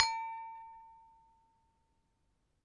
Sample pack of an Indonesian toy gamelan metallophone recorded with Zoom H1.
gamelan, hit, metal, metallic, metallophone, percussion, percussive